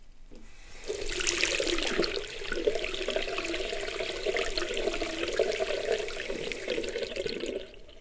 water sink 6
Water pouring into water sink.